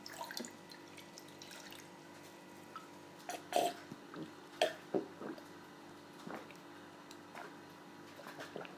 This is the sound of water filling in glass then drinking it. Recroded with an iPhone 4s